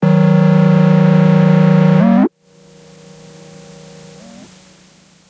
analog tape test tone from cassette 1995
While going though old tapes, I found this little gem. Very interesting test tone on tape.